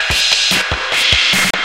glitch perc 21
A processed drum loop from an old drum machine.
beat, glitch, loop, percussion, processed